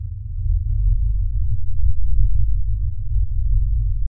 guacamolly rumble loopable
Low, low (not deep) rumble that is perfectly loopable.
loopable, low, rumble